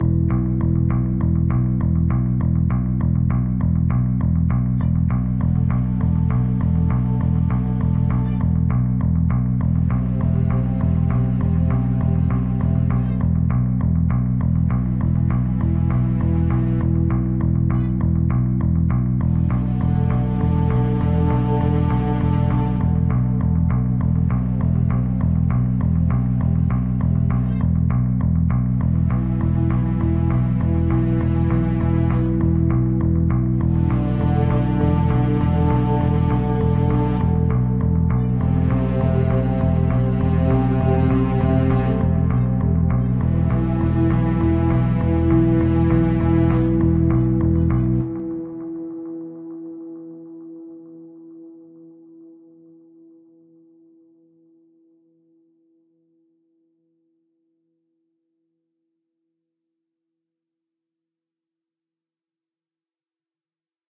Dangerous City
danger sound for videos and games scenes
Danger, effect, fx, game, movie, sfx, sound